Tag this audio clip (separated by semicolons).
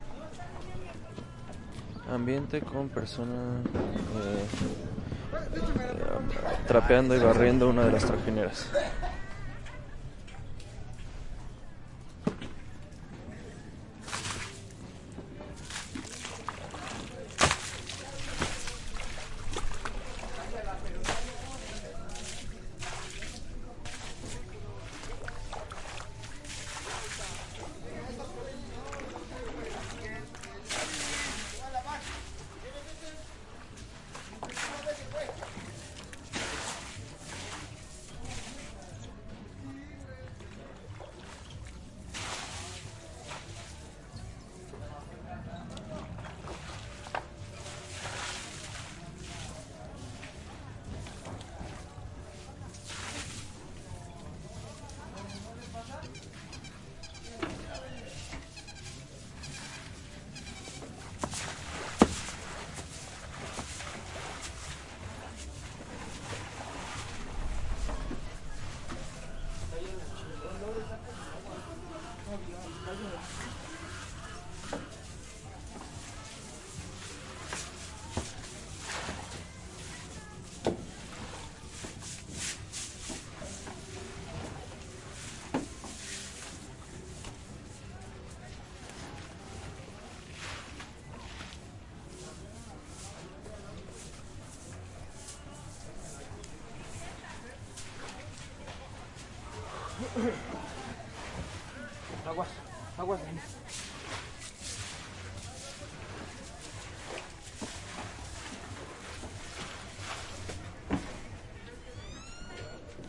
market; ambience; water; morning; people; port; boat; spanish-voices; busy; Mexico-City; voices